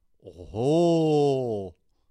Exclamation of "OhOhoh!" in sort of surprise.